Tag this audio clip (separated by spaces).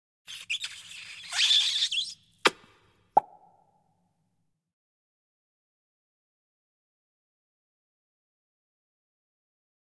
cheek kiss voice